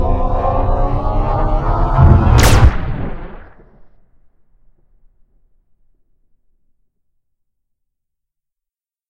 A laser shot I made for a project I'm working on with some charging at the beginning
Laser, Sci-Fi, alien, energy, pulse, shoot, shot, space